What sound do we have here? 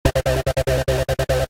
Bass 03 145bpm
150bpm, Bass, epic, techno, trance